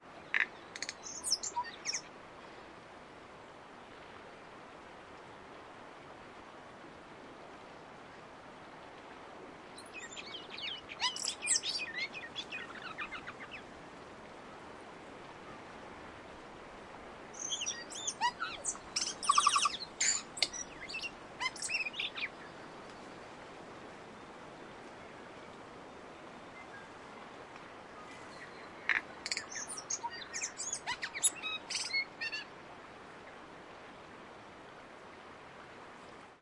A New Zealand Tui bird singing. The bird sits in a bush close-by and produces various colourful noises with short breaks. Some wind, in the background the waves of the ocean.
Post-processing: a soft low-shelf to weaken the noise of the wind.